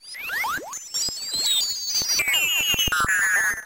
Created using Chiptone